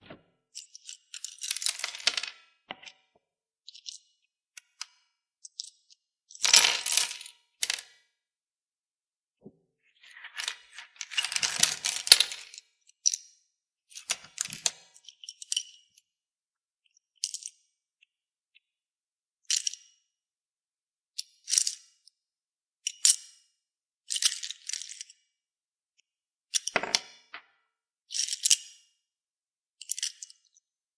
Pieces examined on table
Me fiddling around with pieces on a wood table. Used Audacity to record and compose
coins, drop, dropped, falling, jewels, pieces, table, wood